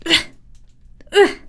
86. Sonidos pelea
fight, sounds, voice